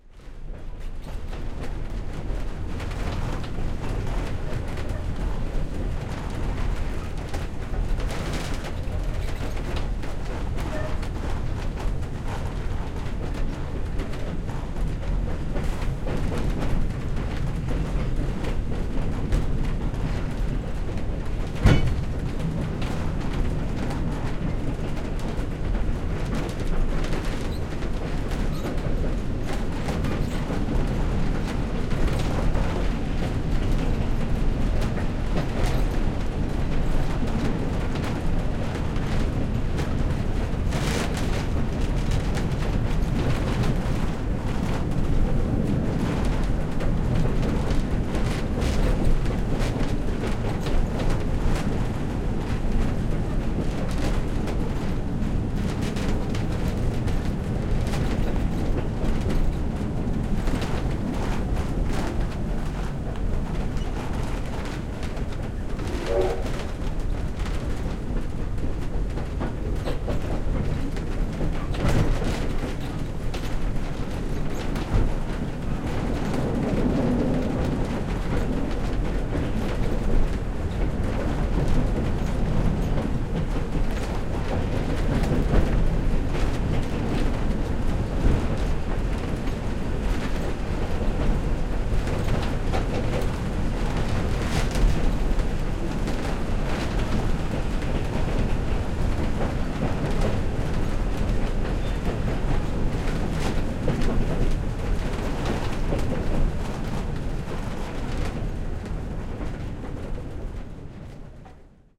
Interior Carriage Slow Moving Steam Train
Recorded inside a creaky old empty train carriage using a Zoom H4.
Recorded from onboard a steam train using a Zoom H4